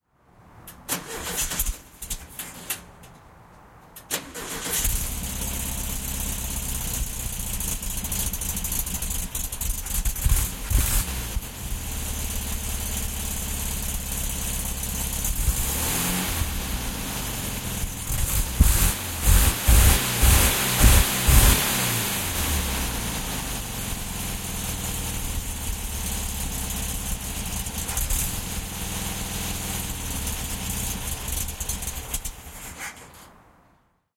1970 VW Bug Engine Starting Sputtering 01
Using a Zoom H2n to record the sound of my 1970 VW Beetle as I started it.
motor, Bug, starter, old, Beetle, engine